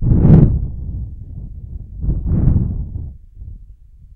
Quite realistic thunder sounds. I've recorded this by blowing into the microphone.